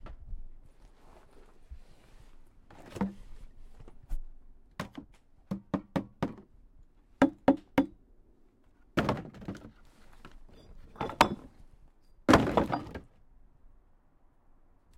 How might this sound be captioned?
recycled bin bottles
various bottle hits, plastic and glass, as if tossed into a bin with others